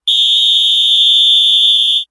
referee-whistle
This is a football (soccer) referee whistle. Recorded at home with a SAMSON C01 microphone and ardour. Good for batukada too.